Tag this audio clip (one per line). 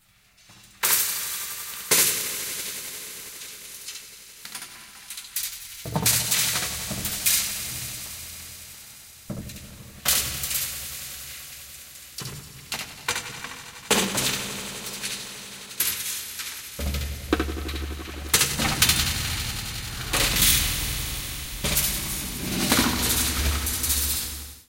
creepy
fantastic
ghostly
horror
metal
poltergeist
processed
scary
spooky
uncanny